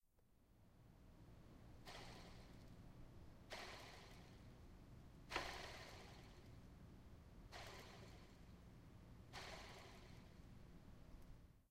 Pedal whi'l
Manually whirling the a bike's pedals themselves
aip09, bicycle, pedal, whirl